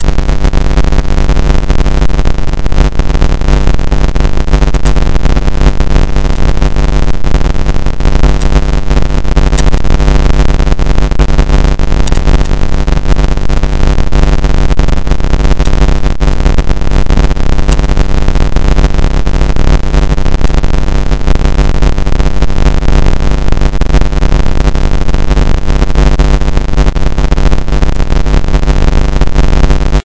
This kind of generates random values at a certain frequency. In this example, the frequency is 100Hz.The algorithm for this noise was created two years ago by myself in C++, as an imitation of noise generators in SuperCollider 2.